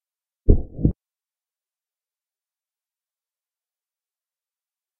Heartbeat 5sec Int
A heartbeat every five seconds. Request by philosophile.